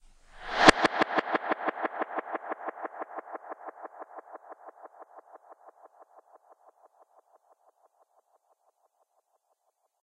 A "swoosh" effect given a fair bit of delay. Attempt at making something that sounds like it's from "Astroboy" or something.